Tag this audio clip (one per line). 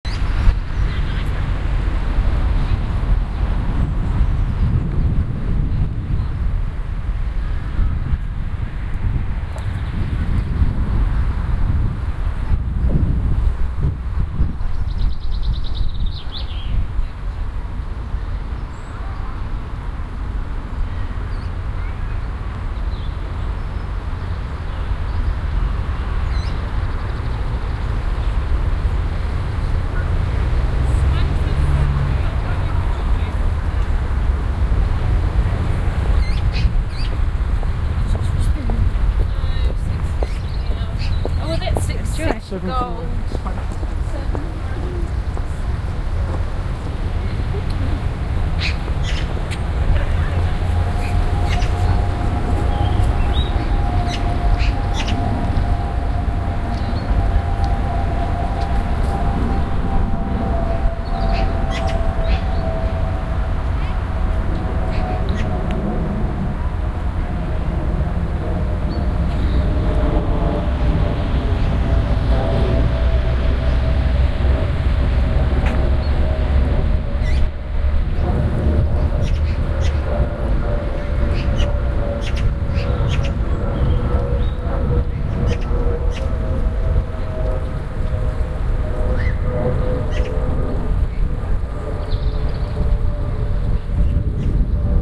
city
soundscape
background-sound
ambiance
general-noise